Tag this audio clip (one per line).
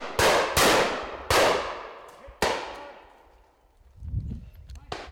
fire
shooting
bang
gunshot
side-by-side
shooters
over-and-under
discharge
pheasants
shot
shoot
firing
gun
shotgun
distant
season